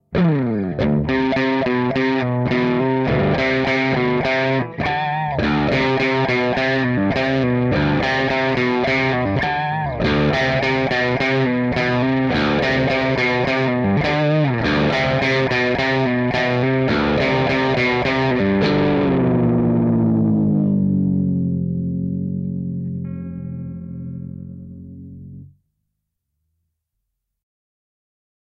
C drop to D
mild distortion tone, changing from C to D with a big bend for the end.
Cchord, Dchord, Distortion, Electric, Guitar, chord, power, tremolo, vibrato